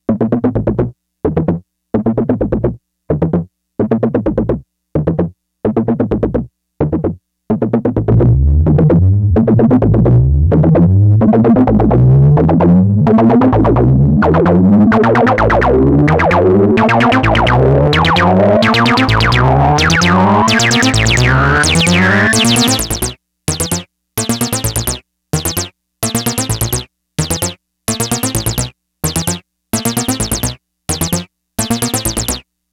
Volca Bass "Vacation Patch #1"
A patch made on the Korg Volca Bass while I was on vacation in Cuba.